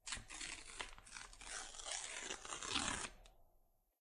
Slow Ripping Of Some Paper 3
Slow ripping sound of some paper.
tear, paper, tearing, ripping, slow, rip